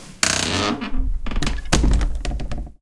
closing old door
groan, basement-door, close